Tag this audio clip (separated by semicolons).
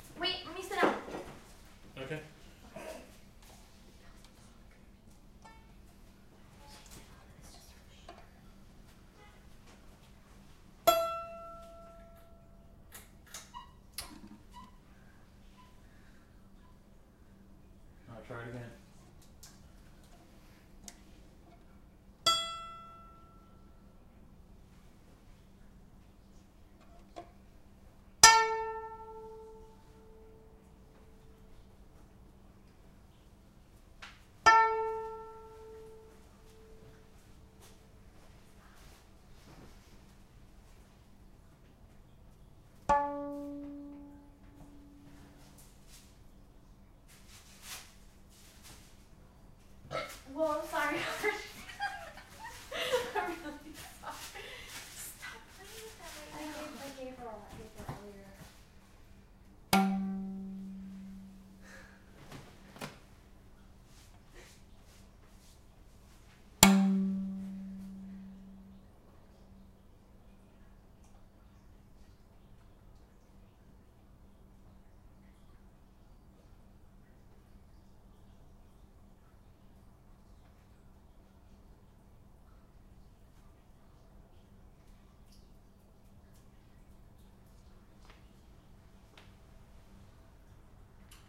plucked; pizzicato; bloopers; noise; violin; background